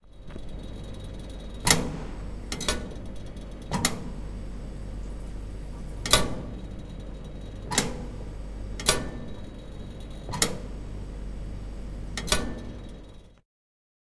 STE-001 Fountain Bottom Pedal
Actioning the bottom pedal of a water fountain from the university.
campus-upf, fountain, UPF-CS12